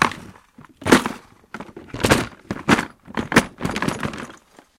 Rummaging through objects with thumps
clatter, rumble, rummage, objects, random